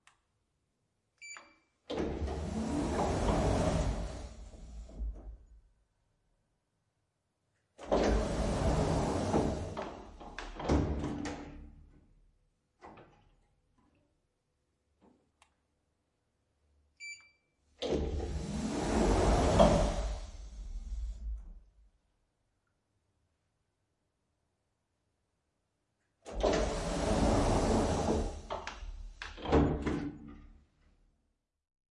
Elevator doors recorded close with a pair of AKG C391B's.